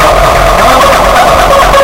FLoWerS 130bpm Oddity Loop 013
DESTROYED LOOP. Made in ts404. Only minor editing in Audacity (ie. normalize, remove noise, compress).
electro, experimental, loop, resonance